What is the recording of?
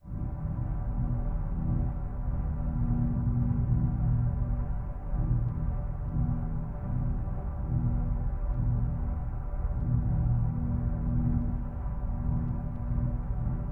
Viral Stabbed Iris
cavernous, drone, atmospheric